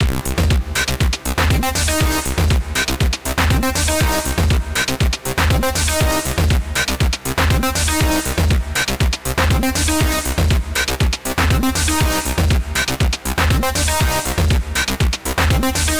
Music loop 120 bpm. Beat, bass and synth. Can be used for techno music.
Loops, Techno